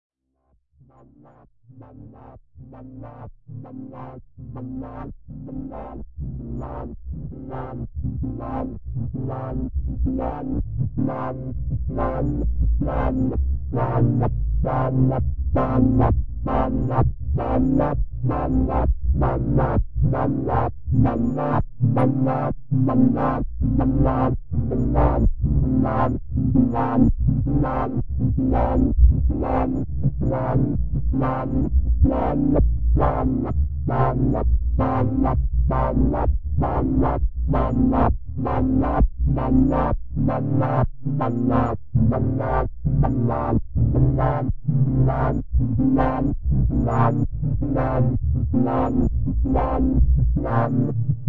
Large synth gated 131bpm
I`ve made this sequence witha virtual synth with my own parameters.
gate, gated, phase, progression, synth, techno, trance